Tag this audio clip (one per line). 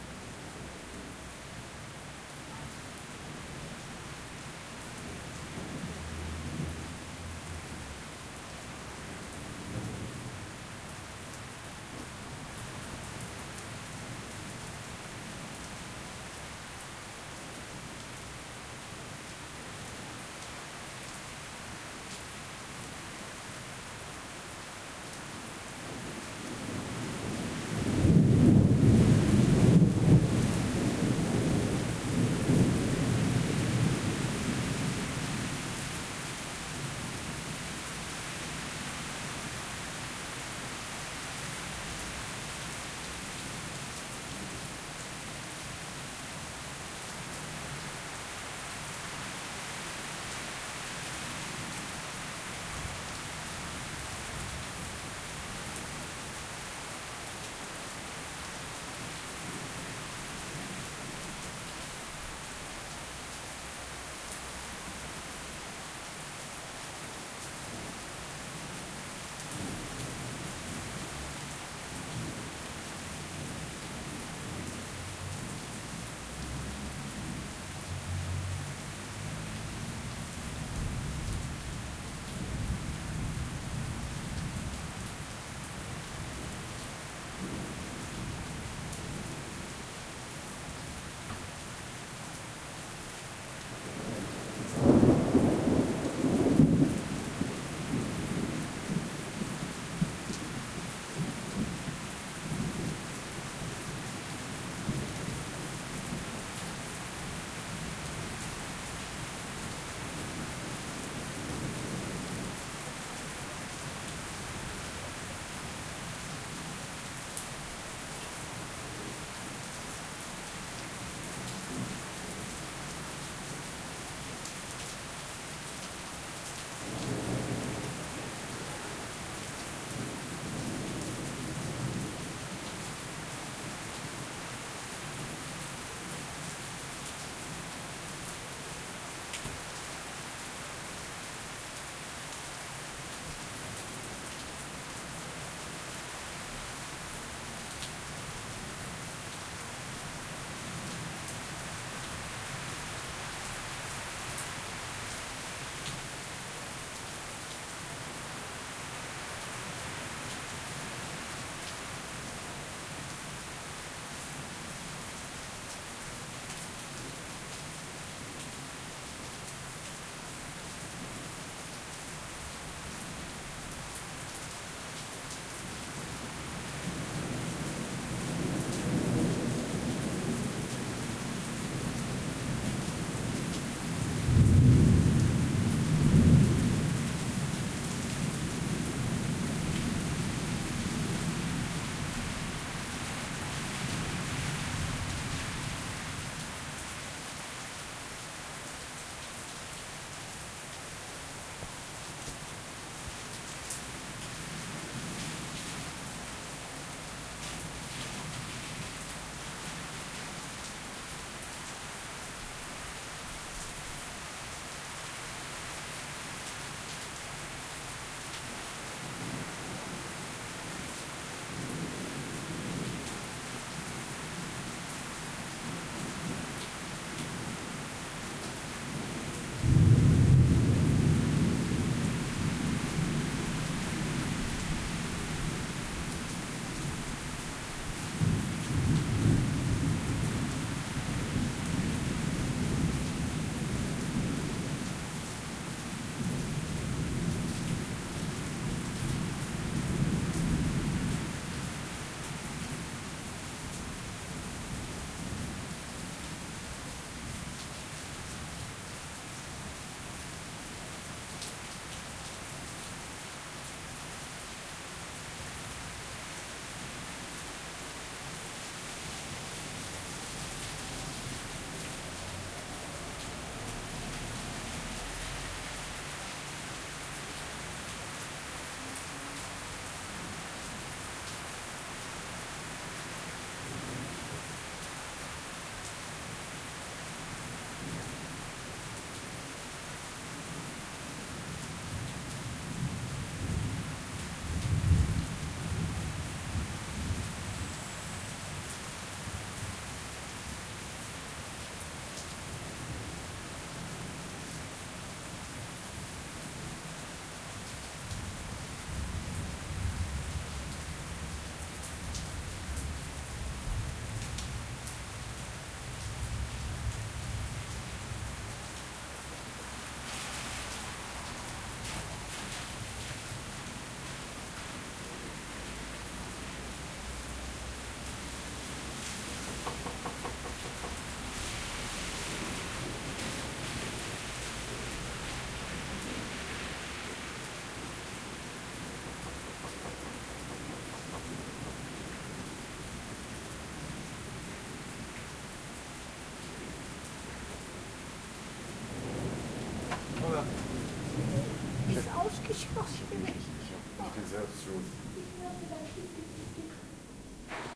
wind
thunder
flash
storm
rain